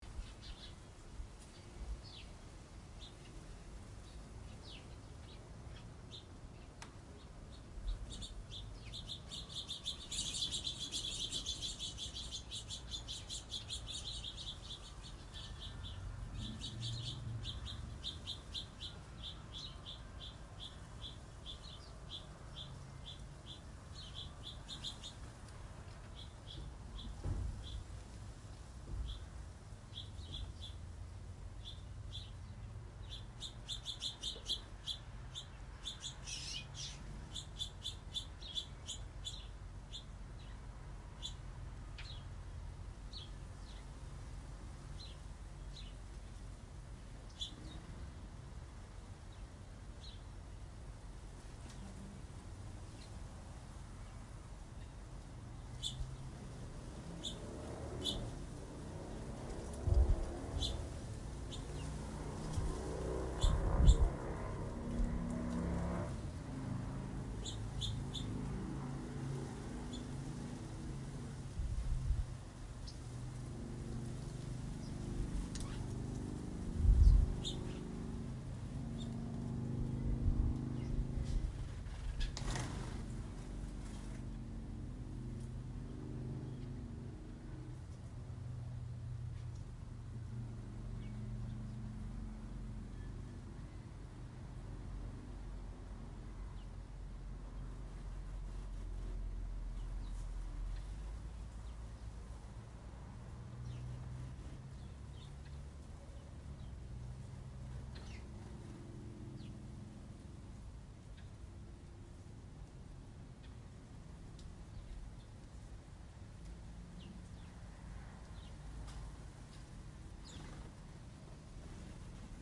Early Morning Sparrows
The sparrows all hang out in this cherry tree in the neighbor's backyard.
birds, birdsong, field-recording, nature